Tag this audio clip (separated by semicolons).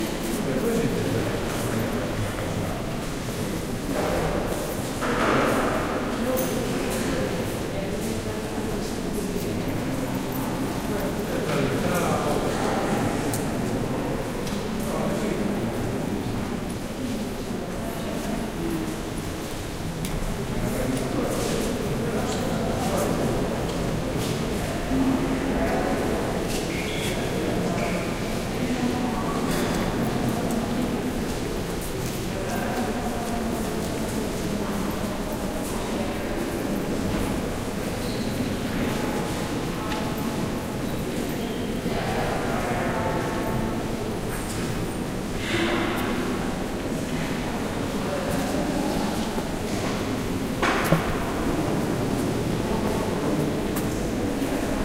ambience; Cathedral; Church; field-recording; Giles; St; voices